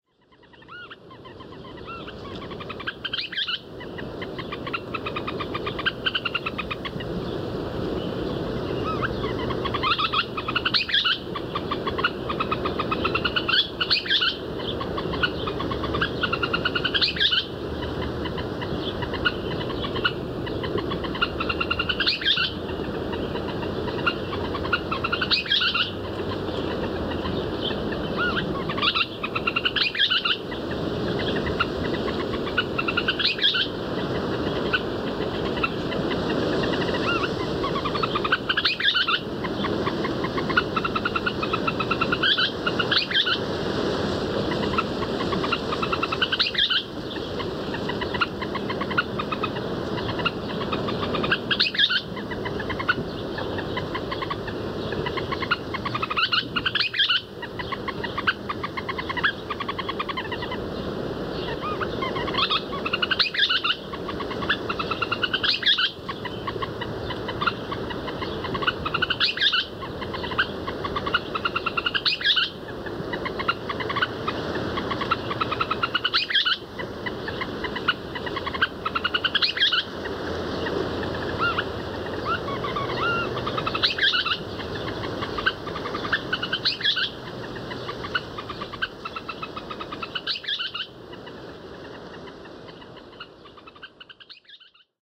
western kingbird spring2001
Recorded Spring, 2001.